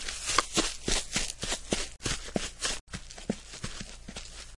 Running through grass foot foley.